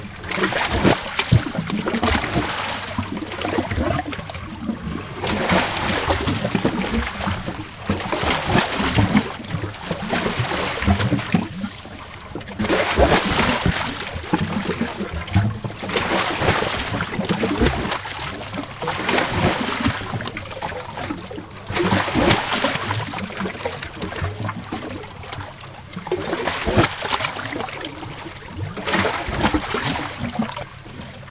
130723 Brela CavityWave 4824
Stereo recording of waves hitting a hollow cavity in the wall of a small stone jetty in the Croatian town of Brela. The sea is somewhat rough, the waves making considerable noise as they hit the cavity. The recorder is situated directly before and above the cavity.
Recorded with a Zoom H2, mics set to 90° dispersion.
field-recording, maritime, waves, atmo, water, shore, lapping, noisy, sea, loud, close-range